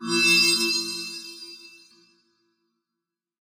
shimmer synth 2
Shimmer sound created by synth
synth, sci-fi, metal, Shimmer